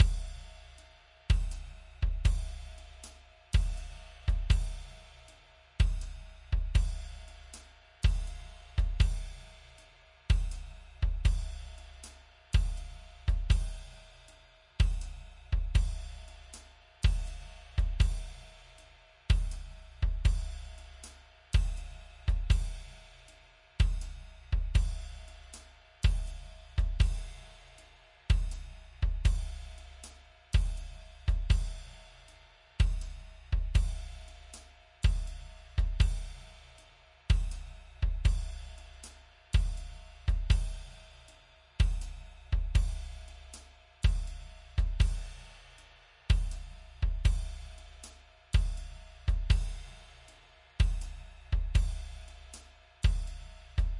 Song6 DRUMS Fa 3:4 80bpms
80, blues, Fa, loop, rythm